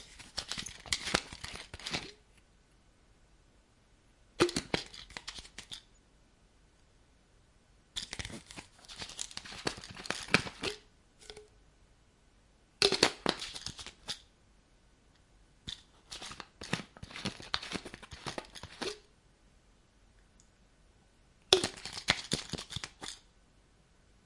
open close small bottle
close, open, small